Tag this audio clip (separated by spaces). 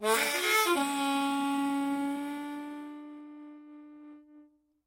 harmonica g key